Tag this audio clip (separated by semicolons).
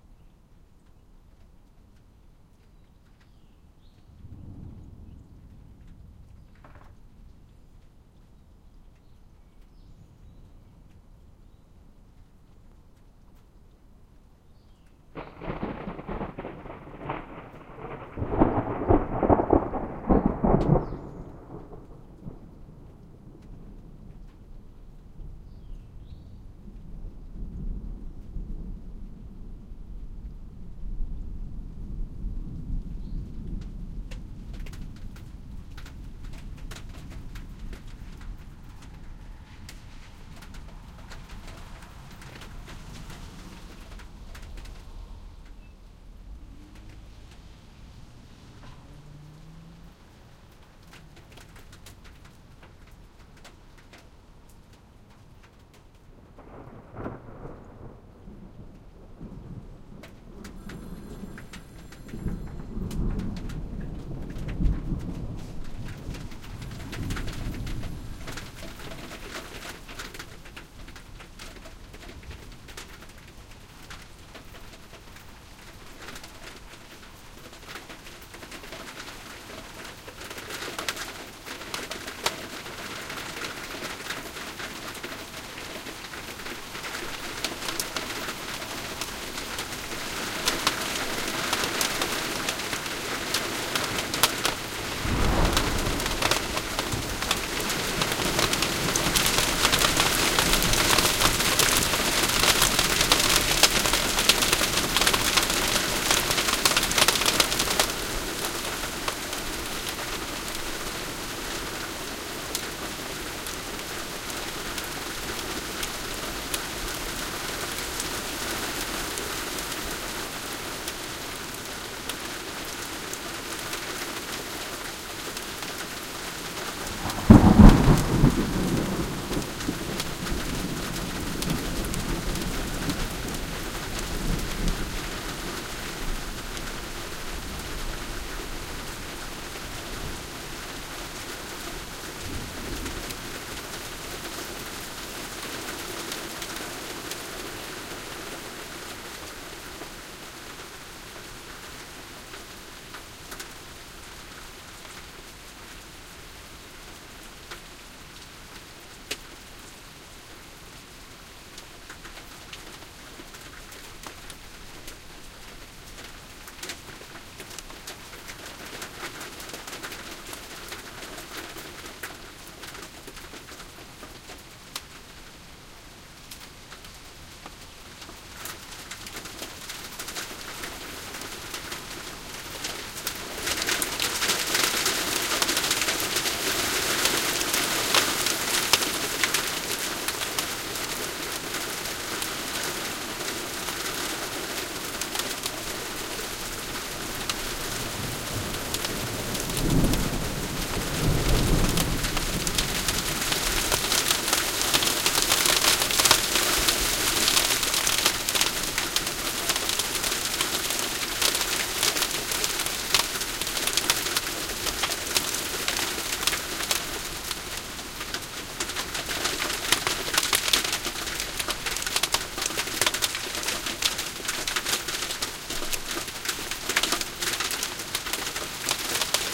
hail; rainstorm